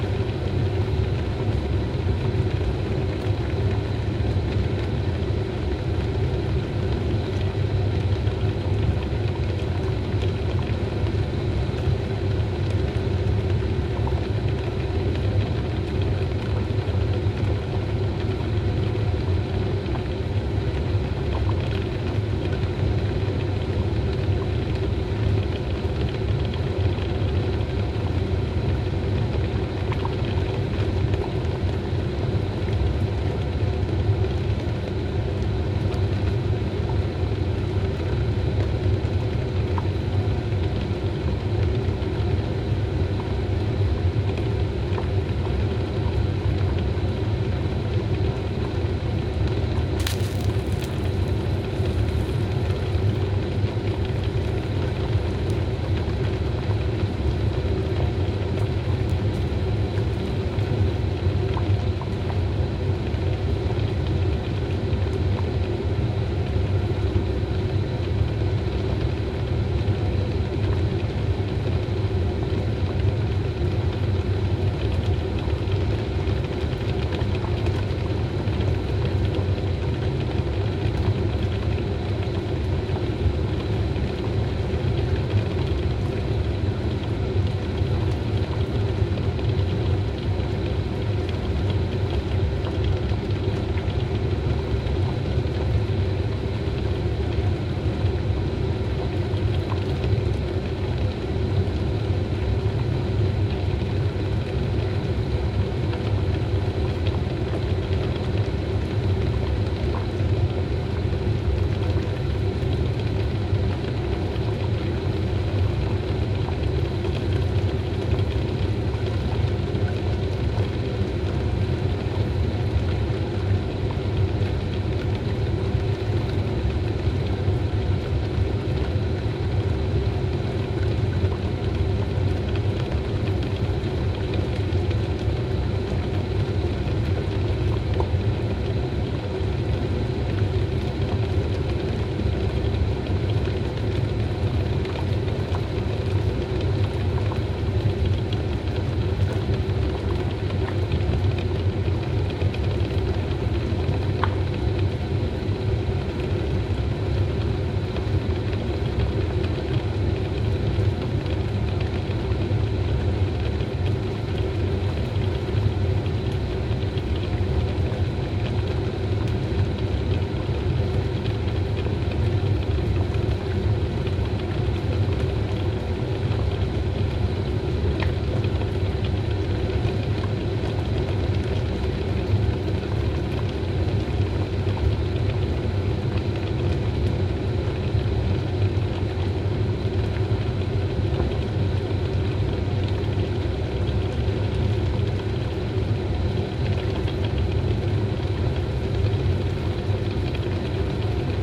time to boil eggs or to cook pasta
cooking, kitchen, stove